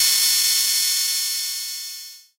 Open Hat 1
Arturia Drumbrute Analogue Drums sampled and compressed through Joe Meek C2 Optical Compressor